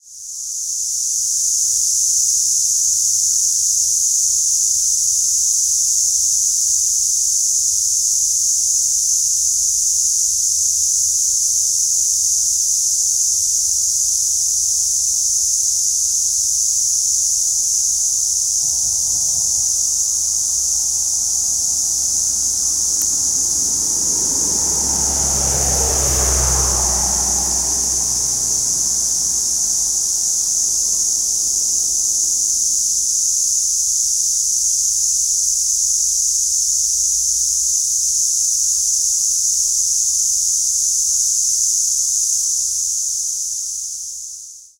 CicadasAndCar GeimoriSapporoHokkaido
Field recording around Sapporo city university at Geimori area Sapporo, Hokkaido. The buzzing of cicadas and a car passed by. Recorded by Roland R-05.